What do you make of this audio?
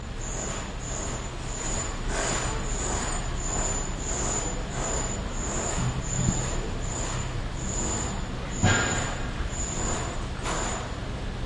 Sound of drill (in the distance) on a construction site. Unprocessed field recording.